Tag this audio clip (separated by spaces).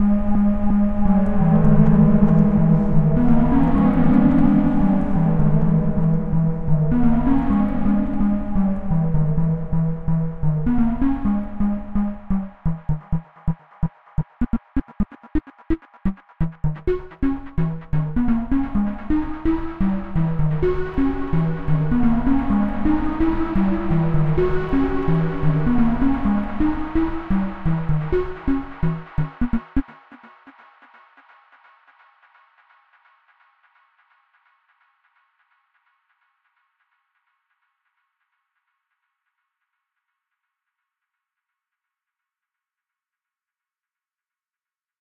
rework resample rat electronic loop pizza multisample melodic wet sample fminor melody 351998 synth steamwhistlelofi lg minor remix